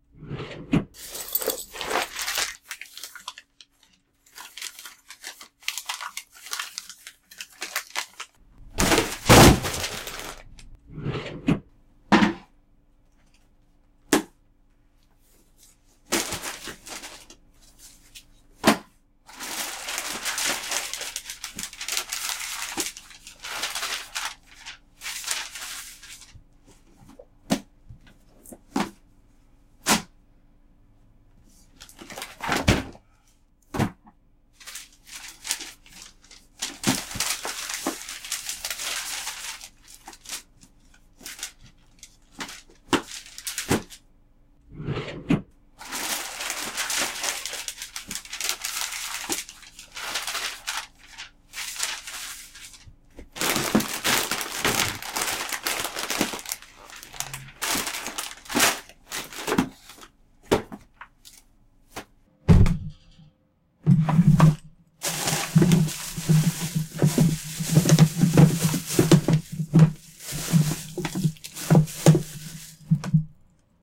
rummage drawers papers books
Someone rummaging through drawers and papers, looking for something.
Recorded with a Blue Yeti mic, using Audacity.